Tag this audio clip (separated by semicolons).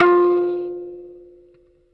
amp; bleep; blip; bloop; contact-mic; electric; kalimba; mbira; piezo; thumb-piano; tines; tone